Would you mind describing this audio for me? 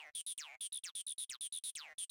HArd one shot bassline